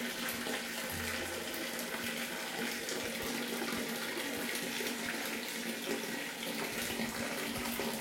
water from tap
Sounds recorded inside a toilet.